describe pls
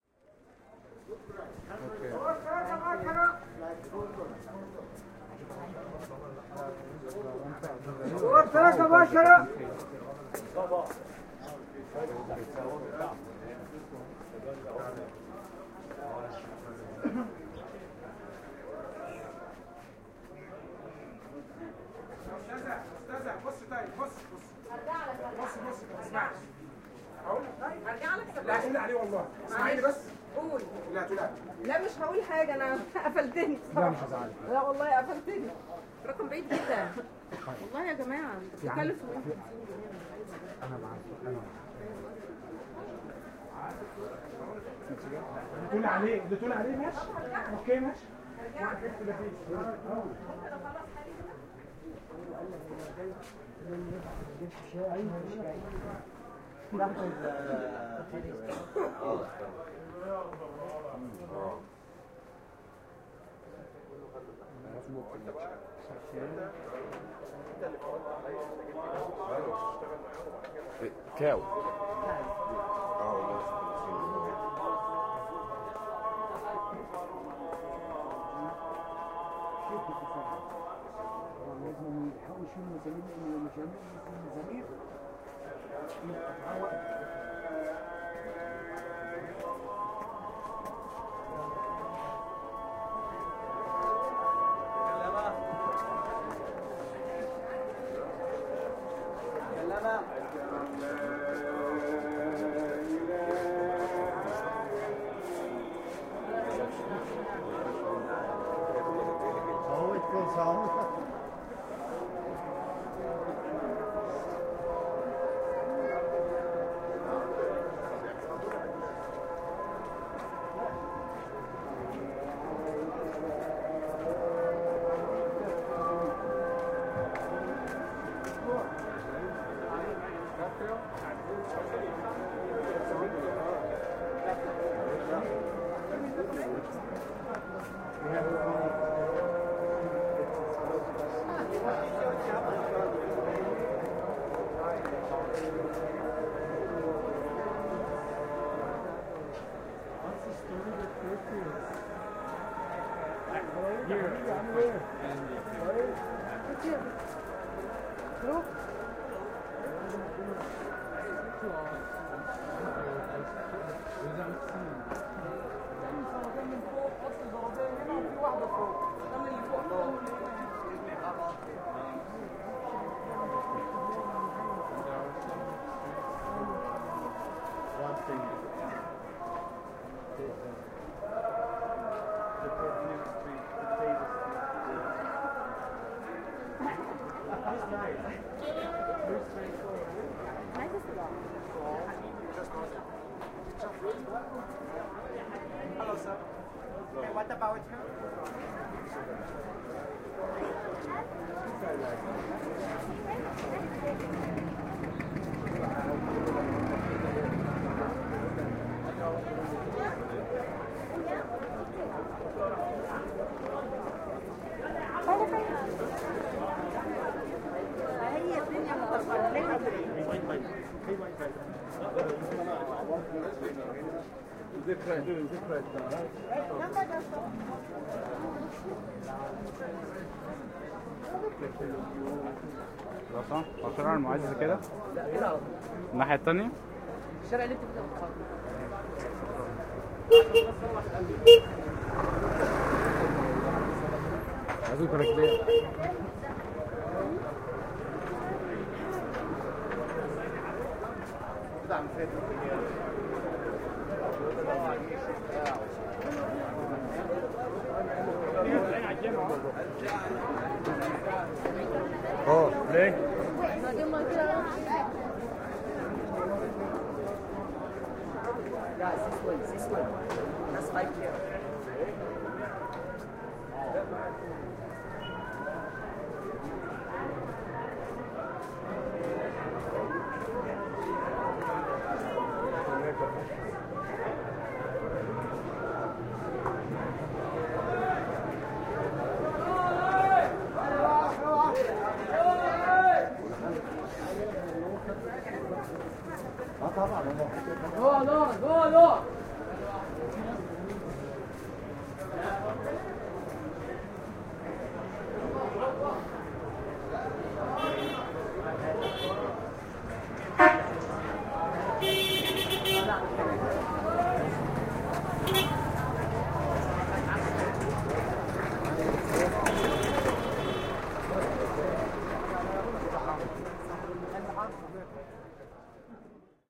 An early evening walk through the streets of Khan El Khalili bazaar in Cairo, Egypt.
bazaar, Egypt, Khan, souk, El, Khalili, market, souq, Cairo